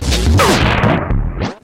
Quick pitch-down, degenerates into saturated distortion.Taken from a live processing of a drum solo using the Boss DM-300 analog Delay Machine.
glitch, lofi